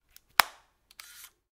Camcorder Sony TG3 Close 1
Electronic beep and shutter sounds from videocamera
mechanic, camera, beep, electronic, shutter